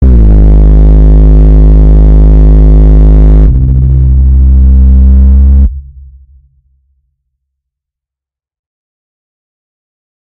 War of the worlds Tripod horn
Heya! This is my rendition of a War of the Worlds Tripod's horn heard close-up. It's loud, but it's the only way I could find to get the desired distortion effect. Enjoy!